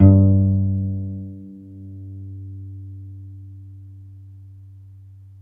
A pizzicato multisample note from my cello. The sample set ranges from C2 to C5, more or less the whole range of a normal cello, following the notes of a C scale. The filename will tell you which note is which. The cello was recorded with the Zoom H4 on-board mics.